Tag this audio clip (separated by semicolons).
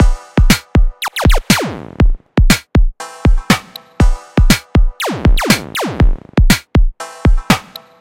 dubstep; laser; lasergun; spaceship; 120bpm; delay; drum; synthesizer